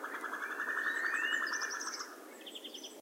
call of a Green Woodpecker / grito de un Pito real